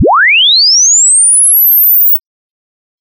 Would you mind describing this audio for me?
sweep 10Hz 22000Hz -3dBFS 3s linear
Linear sine sweep from 10Hz to 22000Hz over 3 seconds.
chirp, tone, sinewave, sweep, test, sine